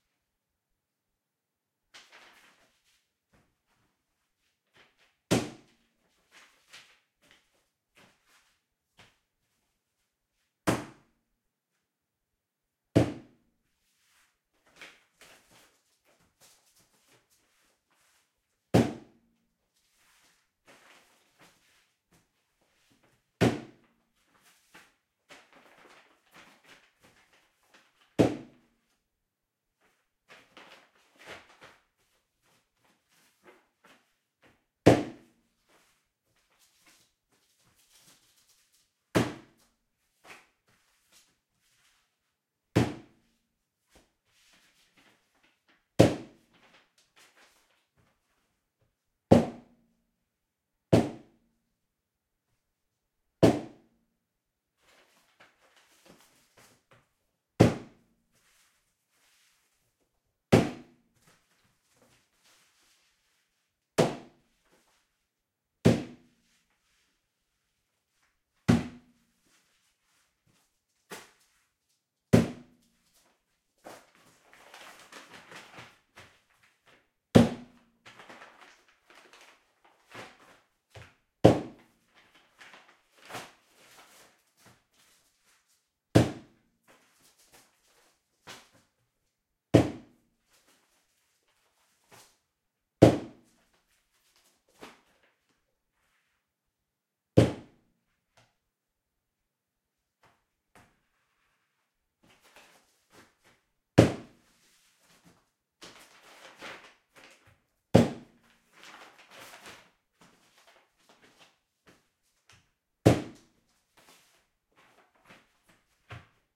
stamp post office mail
I needed the sound of stamping letters in a post office. (for background use)
I could not find any good, so i made this.
I used to work in a postoffice, and i think the sound is pretty close.
Nagra Ares M with improved XY mic.
I used a little (-6dB) iZotope2 NR
letter, mail, office, post, stamp, stamping